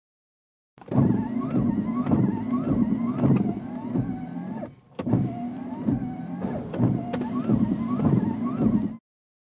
MITSUBISHI IMIEV electric car FRONT WIPERS int
electric car FRONT WIPERS